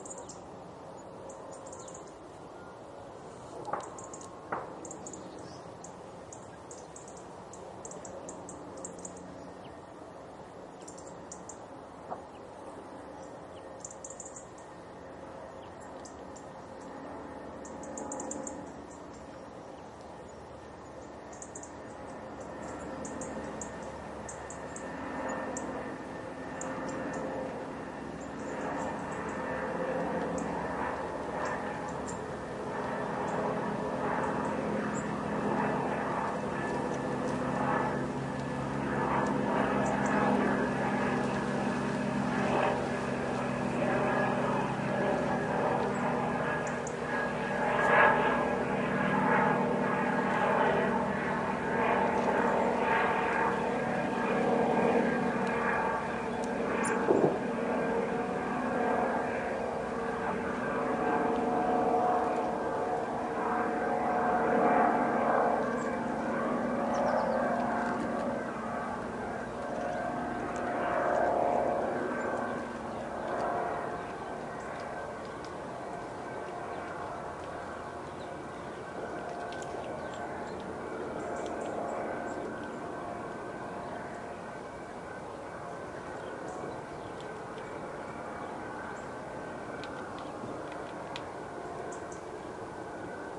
an airplane approaches on a background of bird (Robin) calls and distant shots
airplane, birds, field-recording, nature, winter